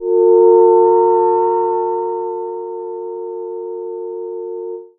minimoog vibrating A-4
Short Minimoog slowly vibrating pad
electronic, minimoog, moog, pad, short, short-pad, slowly-vibrating, synth, synthetizer, vibrato